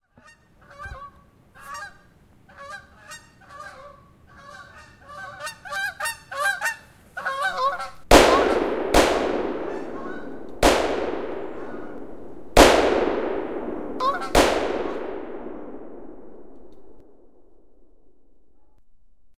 Goose Hunt
A sound I put together for a show I'm assistant directing. I thought it may be helpful to upload it so others could use it as well.